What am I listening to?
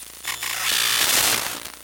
phone unlock
A noisy squelch caused by unlocking the lock screen on a recent cell phone. Recorded with an induction coil microphone.
cell-phone, field-recording, induction-coil, noise, noisy, sci-fi, squelch